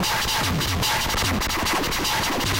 Scrambled Brians2
bells, clash, drums, hmmm, loops, remix, retro, things, whatever